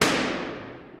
Medium Explosion
-Gunshot
-Explosions
-Bang
Mic(s): Shure SM7B
Source: Balloon Popping in a Stairwell
Processing: Limiting, HPF ~40Hz, natural reverb.
Channel: Stereo
Gunshot, Bang, shoot, machine, studio, war, shot, Explosion, gun, game, Rifle, Bomb, Hit, foley, Boom